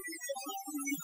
the kind of sound you get when you set your noise removal decently high
digital, computer